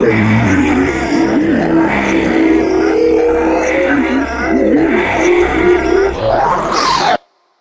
Voice transformation 3
abstract, dark, destruction, futuristic, glitch, metalic, scary, transition